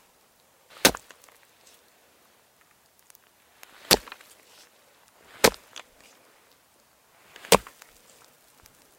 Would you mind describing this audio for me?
Knife/sword stab #1
A knife or sword being repeatedly stabbed into something...or someone.